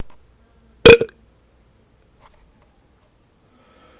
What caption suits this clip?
Raw recordings of burping, unedited except to convert usable format.
raw, burp, gas